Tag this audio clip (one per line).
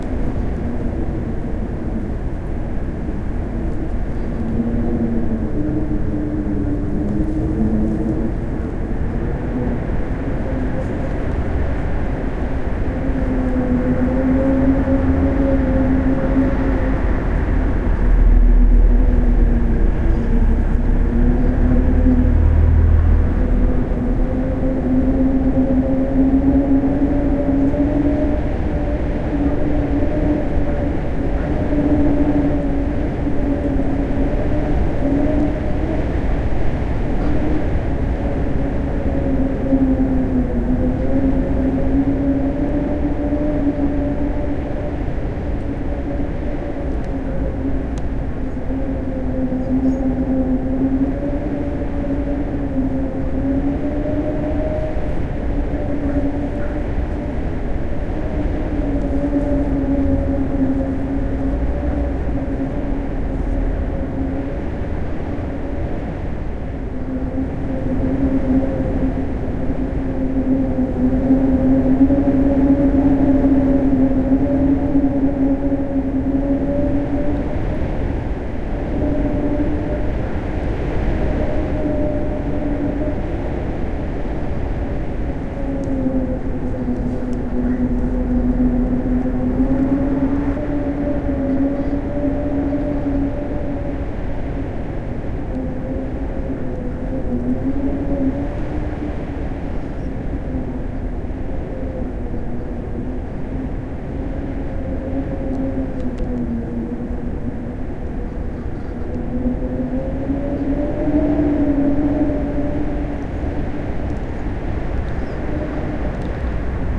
field-recording
nature
storm
gale
night
wind
scary